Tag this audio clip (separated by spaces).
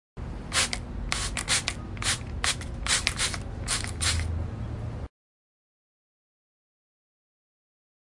water spray bathroom bath Barber bottle